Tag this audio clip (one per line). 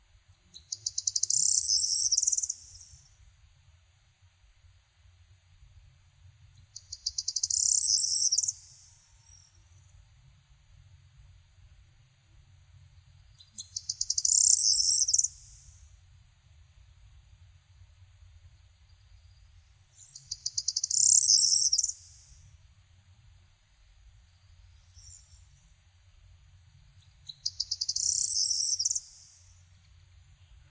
field-recording
nature
south-spain
birds